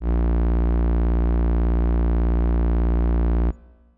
FM Strings As1
An analog-esque strings ensemble sound. This is the note A sharp of octave 1. (Created with AudioSauna, as always.)
pad, strings, synth